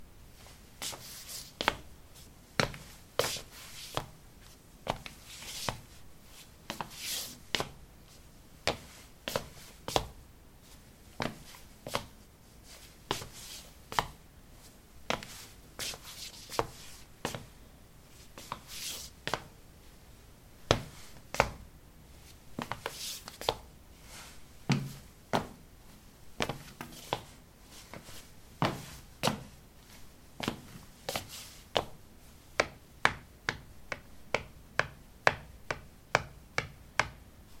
Shuffling on ceramic tiles: sneakers. Recorded with a ZOOM H2 in a bathroom of a house, normalized with Audacity.